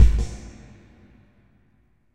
ss-flutterbase
A background Snare accent - electronic and deep
bass, electronic